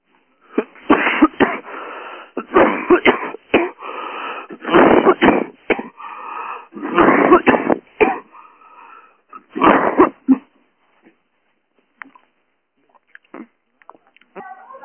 Coughing at night of February 8th 2010.